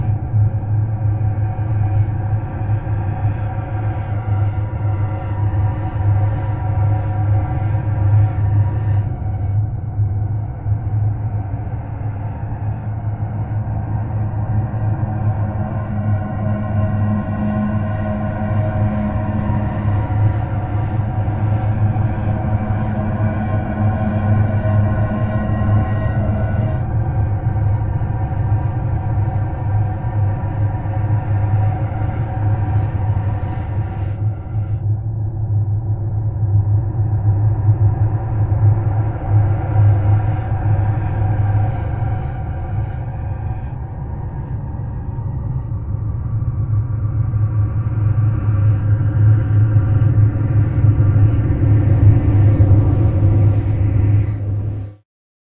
atmosphere sci-fi horror sndtrk 02
Same as the above file except reversed or turned backward.
audacity, filters, horror, processed, sci-fi, scream